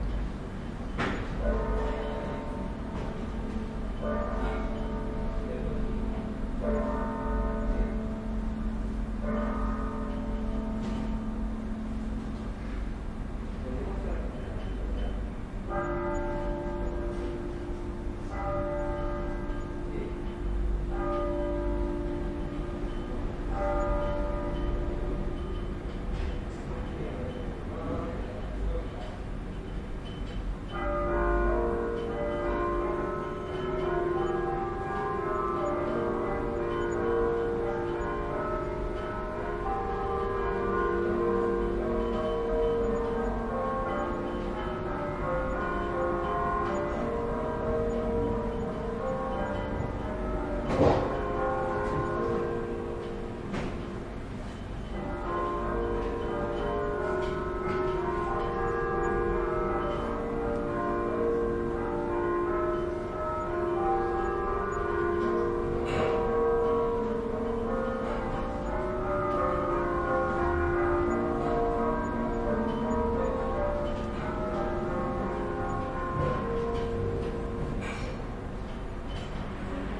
Recording of the 16h bell ring of Sagrada Familia church in Barcelona. Recorded at a bedroom in the 6th floor of a building close to the cathedral at April 25th 2008, using a pair of Sennheiser ME66 microphones in a Tascam DAT recorder, using a XY figure.